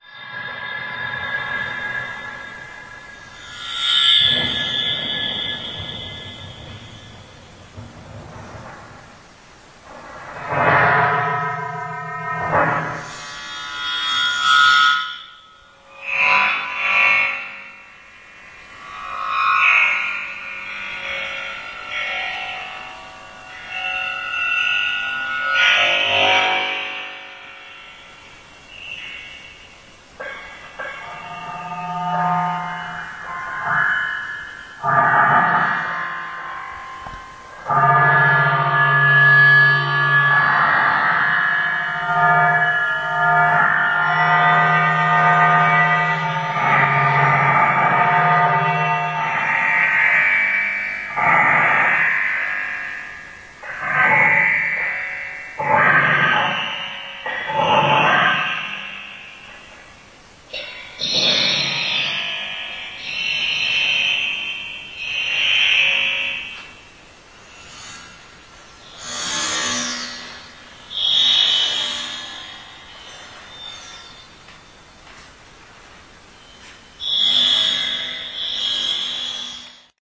strange loop performance1 081210
08.12.2010: about 20.40 the Strange Loop performance: audio-video-dance performance. Poznan, Ratajczaka street, in Theatre of the Eighth Day seat.
music, sounds, field-recording, noise, theatre, performance, poznan, feedback, poland, stage